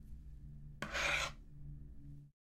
Picking up with spatula
Picking up something (like a clay mask) with a spatula.
Furnace, Makingamask, Bake, Pickup, random, table, Metal, Putdown, Kitchen, Mask, Spatula, Baking, Oven, Wood